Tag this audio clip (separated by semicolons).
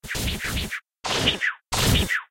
battle; combat; fight; FX; game; gun; gunshot; hit; impact; manga; pierce; piercing; SFX; shuriken; slash; sound; stab; stabbing; swoosh; video